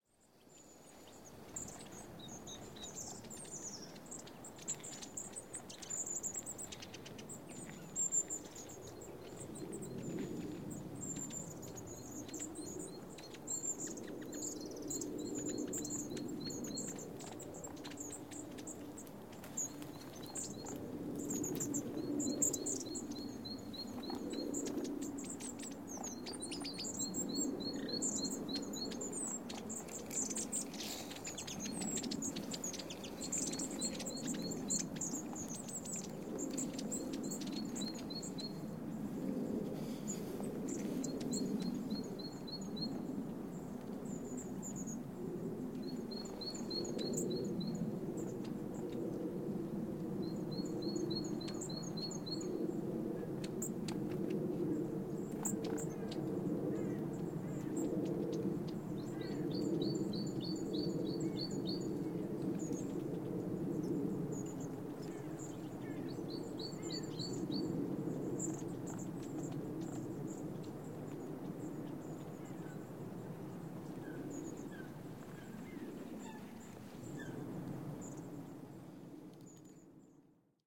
bird in oostduinen 15
Birds singing in oostduinen park in Scheveningen, The Netherlands. Recorded with a zoom H4n using a Sony ECM-678/9X Shotgun Microphone.
Evening- 08-03-2015
birds, netherlands, nature, field-recording